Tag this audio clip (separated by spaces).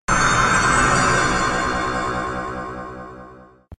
time magic stop